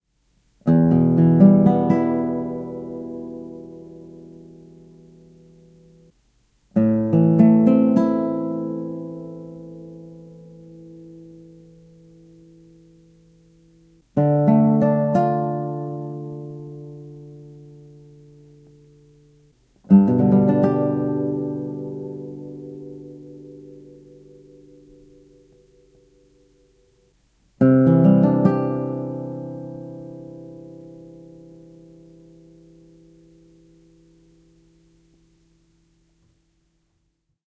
Some chords played on my Pilarte classical guitar using my thumb nail. E minor, A minor, D minor, G, C (add9). Recorded on my Yamaha Pocketrak and edited in Sony Vegas. There is a hiss. Thanks. :^)-
a, c, d, e, major